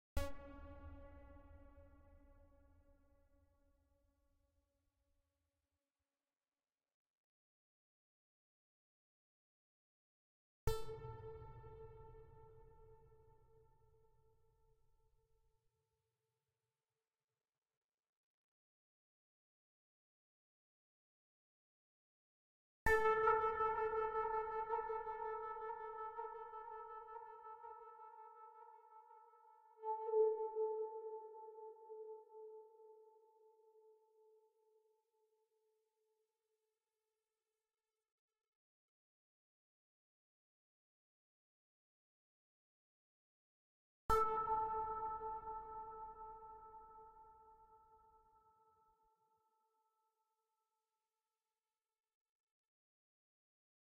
After years... days of experimentation, I have finally finished this lovely acid-like sound with a really great atmosphere.
Also, this was mathematically generated to be awesome, so, take it slow.
Included: 4 variations.